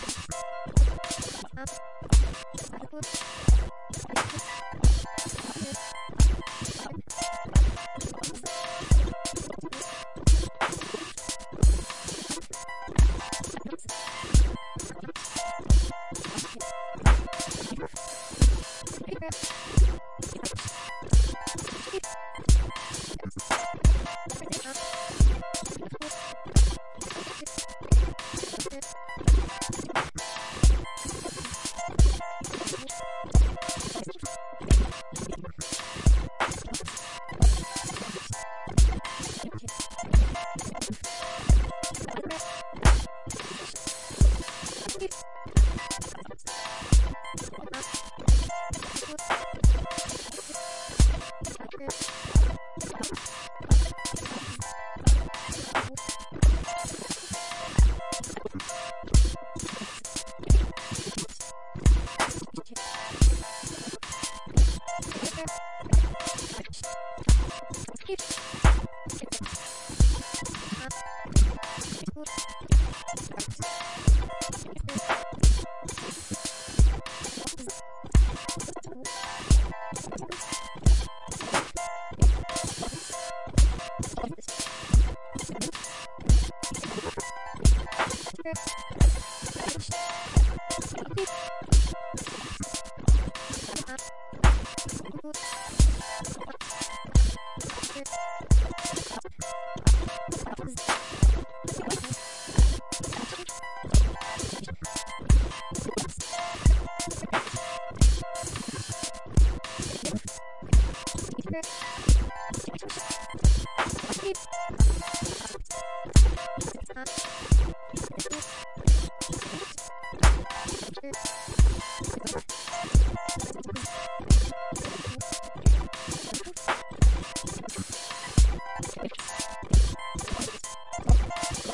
polyrhythm loop
random sound collage to build samples up
ambient, glitch, idm